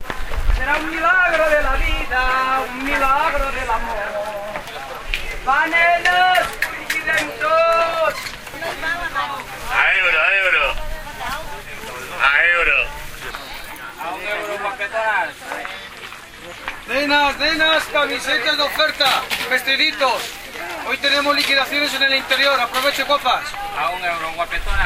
This sound was recorded by an Olympus WS550-M. It's the ambient of the market on Thursday were everything is very cheap.